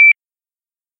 GUI Sound Effects 037
GUI Sound Effects
GUI, Beep, Menu, Game